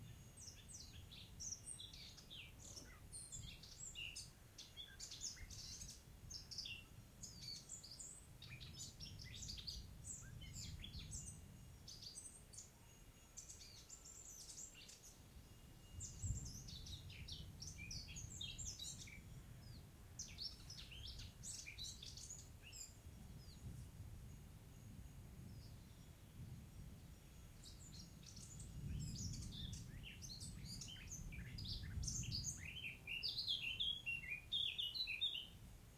Leeuwerik2 kort
Field-recording in northern Spain of a lark singing happily. Some wind noise.